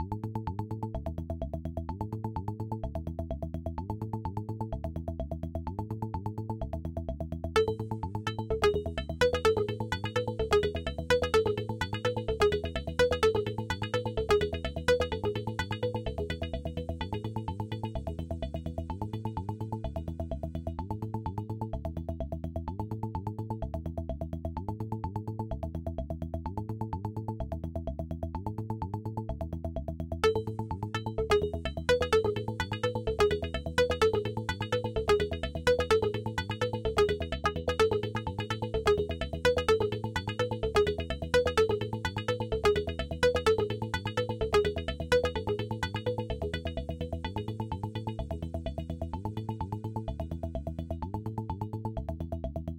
electro loop line
synth, techno